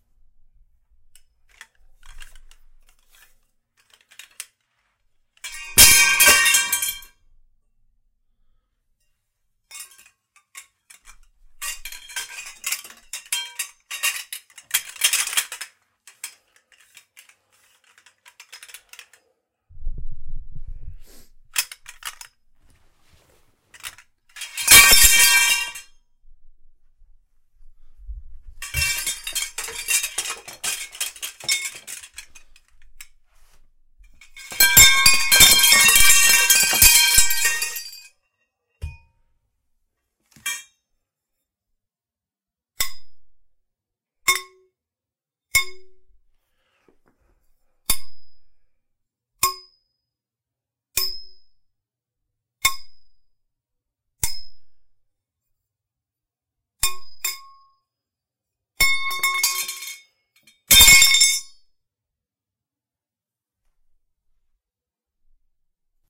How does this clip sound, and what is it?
Röhren fallen
Couple of pipes fall on the ground and get picked up again.
fall, fallen, hren, l, metal, metall, pipes, r, rohre